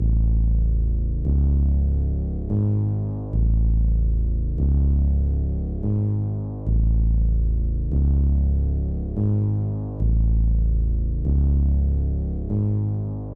Bass loop for hip hop music